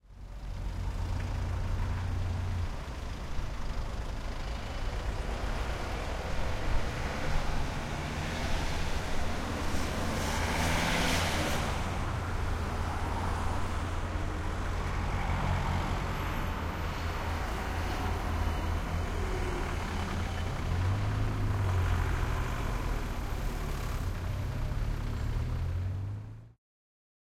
traffic lights vehicles ambience
Recorded with a Sony PCM-D50 from the inside of a peugot 206 on a dry sunny day.
A little ambience caught at a crosswalk in Amsterdam, buses, trucks and cars passing by and stopping for the traffic lights.
ambience, walking, crosswalk, vehicles, traffic, lights, people, heavy